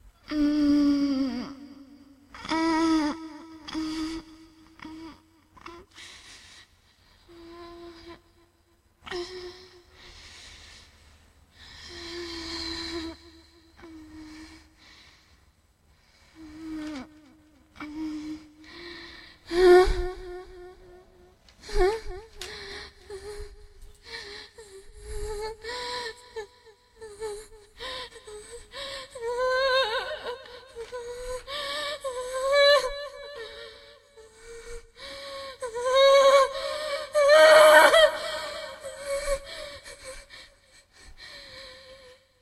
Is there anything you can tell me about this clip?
hurt/scared/tired/: moans, scared breathing
asleep, breathing, Dare-16, female, hurt, moan, moaning, moans, pain, scared, tired